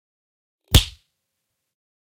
Cartoon Punch 01
cartoon, cartoon-sound, punch